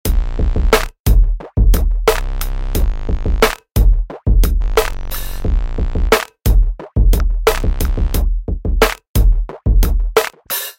Hip Hop beats, design´t to be use´t as it is, or to be cut in to pieces.
beat, hip-hop